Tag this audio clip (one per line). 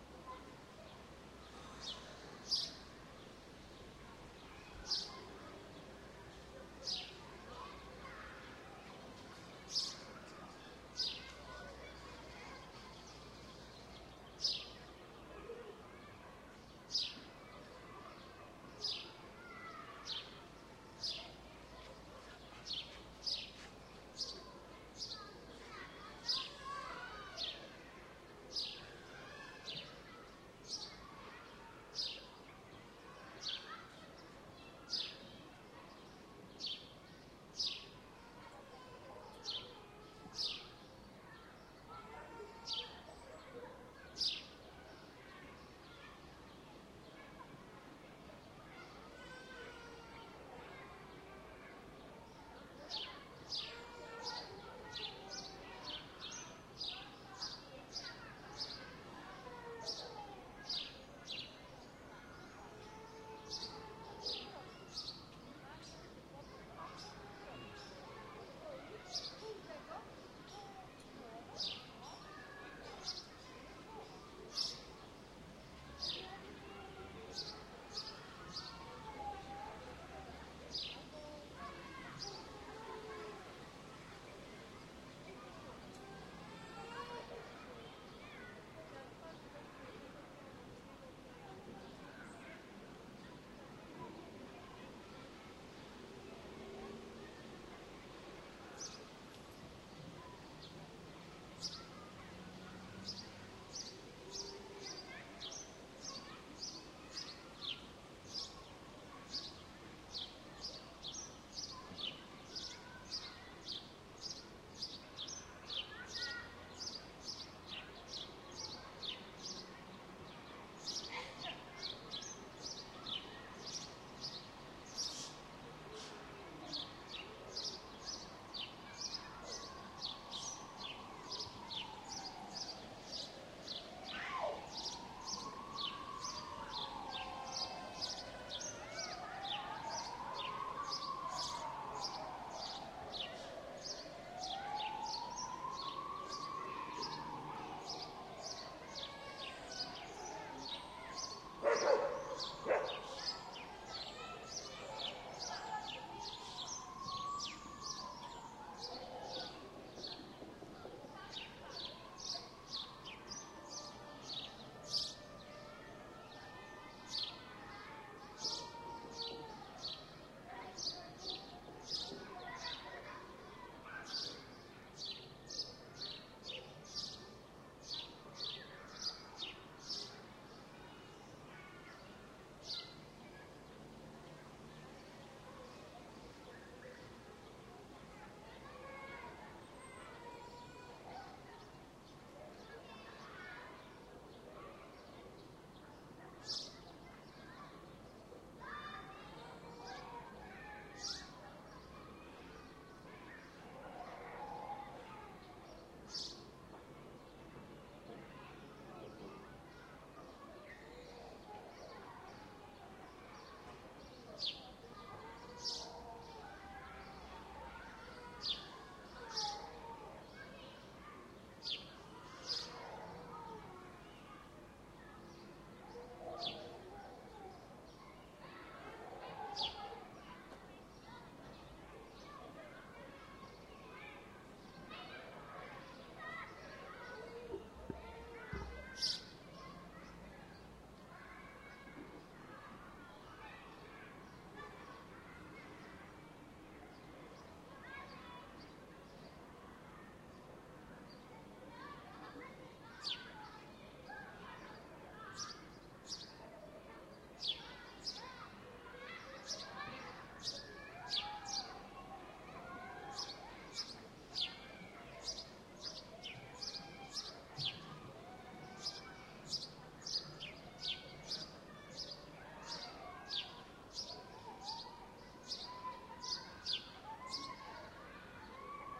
city
birds
dog
other
park
childs
people